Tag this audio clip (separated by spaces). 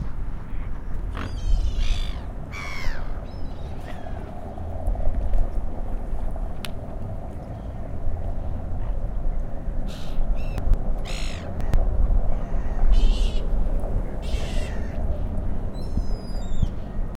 water city river